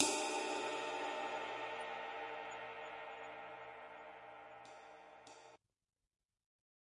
09 Ride Long Cymbals & Snares
Bosphorus, bronze, bubinga, click, Cooper, crash, custom, cymbal, cymbals, drum, drumset, hi-hat, hit, Istambul, metronome, one, one-shot, ride, shot, snare, TRX, turks, wenge, wood, Young